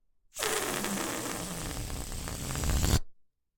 Balloon Deflate Short 2
Recorded as part of a collection of sounds created by manipulating a balloon.
Deflate, Flap, Balloon, Short, Fart